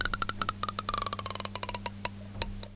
The wonderful sound made by running a finger along a comb